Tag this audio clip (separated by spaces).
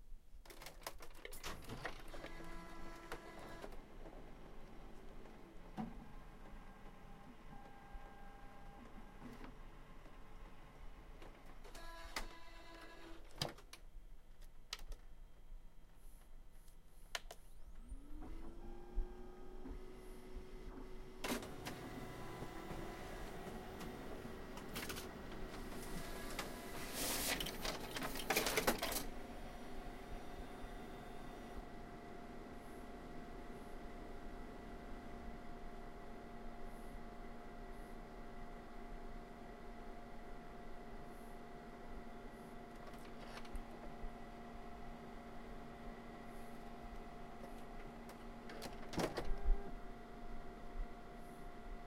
Copier copies copy foley machine mechanical office soundfx